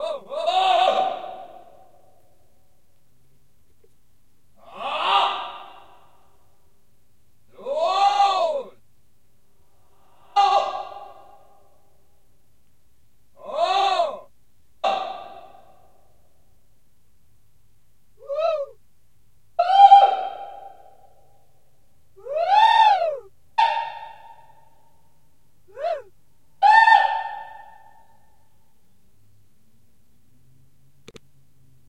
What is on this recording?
This sound are taken at Hahn, Germany in may 2013. All the sound were recorded with a zoom Q3. We have beat, scrap and throw everything we have find inside this big hangars.
ambience, ambient, atmo, atmosphere, field-recording, germany, hahn, hangar, noise, soundscape